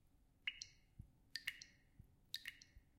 Water dripping off the faucet or a something else